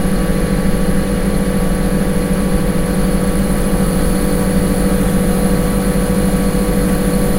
The noise an ice-maker makes from the inside.
Recorded with a Zoom H1 Handy Recorder.